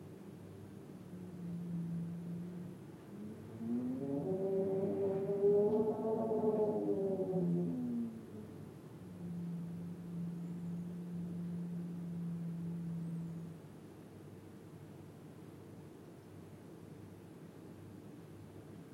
spooky wind 2
Wind sound recorded with oktava mc012->AD261->zoom h4n
tube, wind